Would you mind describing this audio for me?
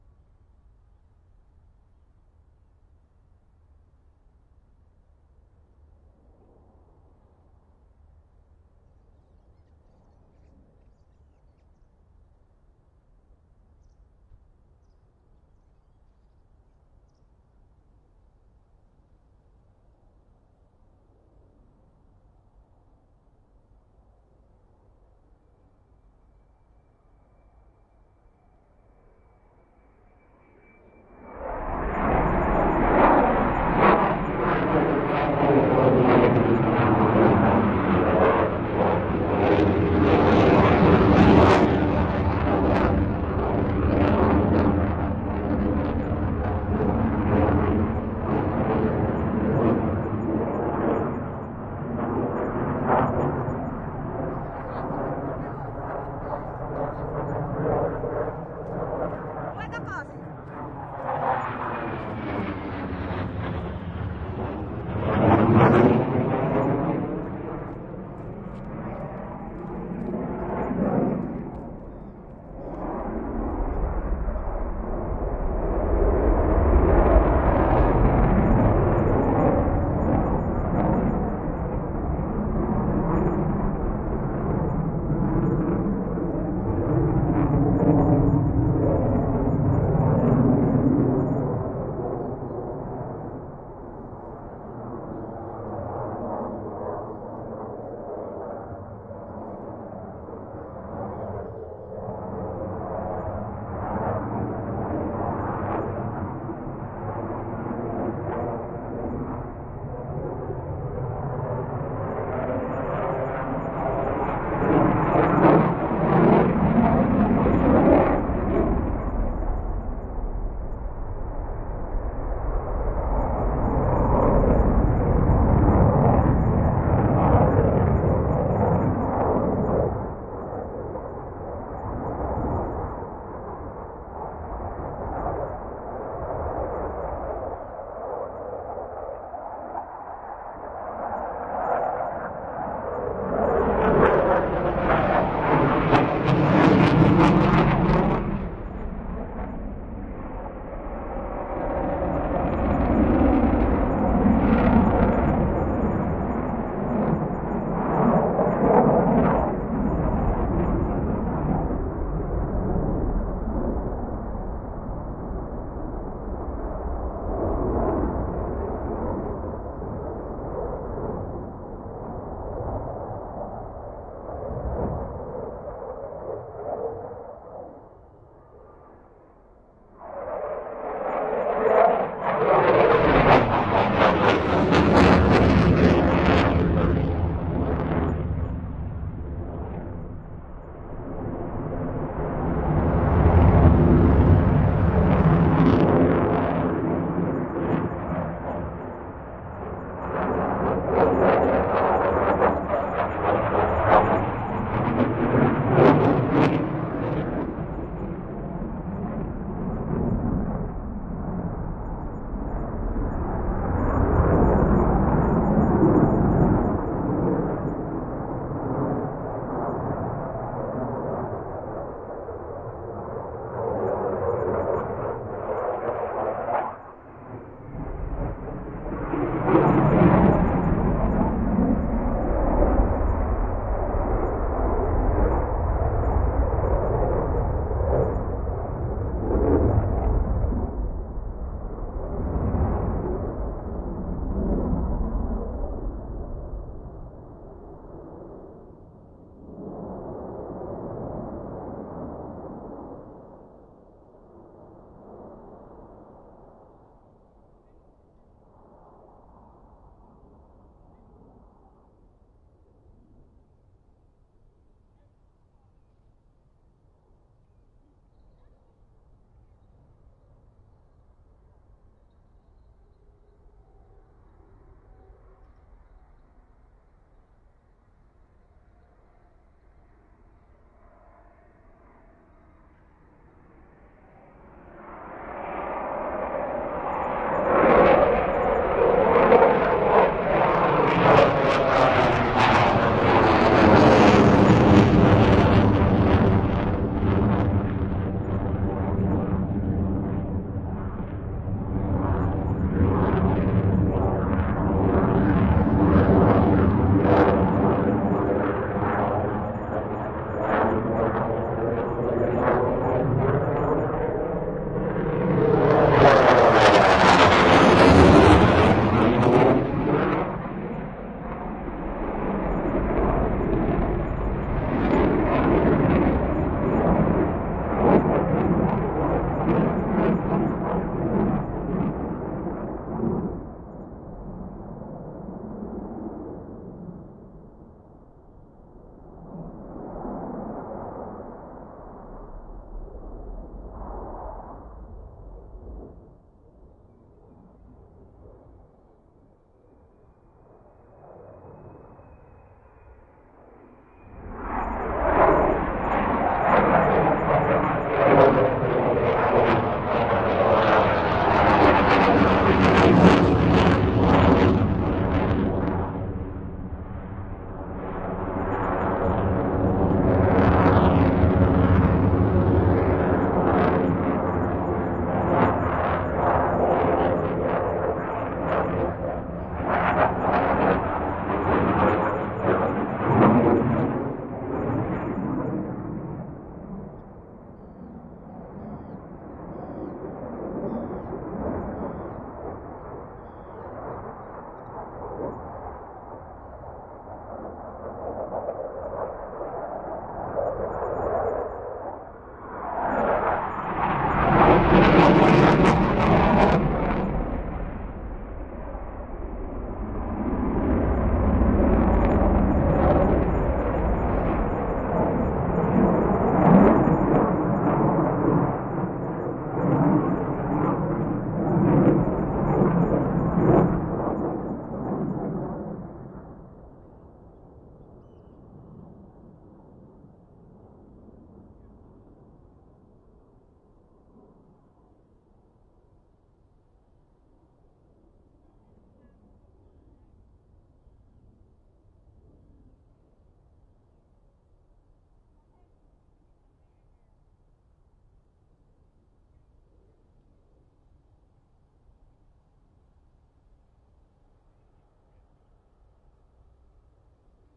McDonnell Douglas F/A-18 Hornet Air Show, Kuopio, Finland
I'd be interested to know where you use this.
There was a single Hornet airshow in Kuopio Harbour today. Recorded the whole seven to eight minutes long show in a relatively secluded location, so there is minimal to none audience in the recording. I left half a minutes of ambient recording to both ends of the recording.
Recording device: Sony PCM-M10
Aeroplane, Afterburner, Air-Show, Aircraft, Airshow, Aviation, Burner, Douglas, F-18, F18, FA-18, FA18, Field-Recording, Fieldrecording, Fighter, Flight, Fly-by, Flyby, Flying, Hornet, Jet, McDonnell, Military, Pilot, Plane